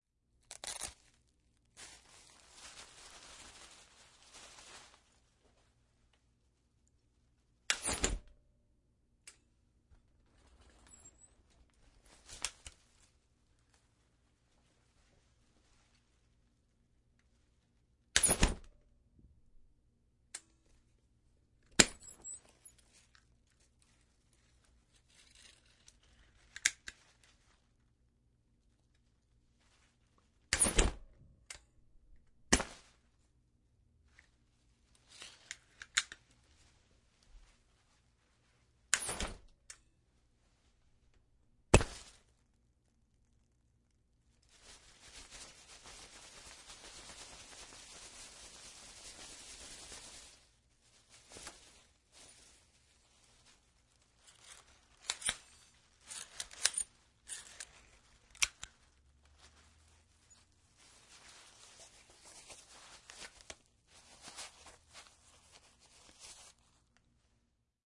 All the sounds I could make using a semi-automatic umbrella.
click, umbrella, whoosh